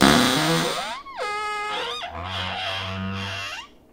One in a series of some creaks from my cupboard doors. Recorded with an AT4021 mic into a modified Marantz PMD661 and edited with Reason.
foley, cupboard, close, creak, door, open, kitchen